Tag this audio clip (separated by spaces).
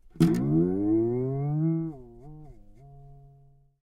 toy cartoon string guitar toy-guitar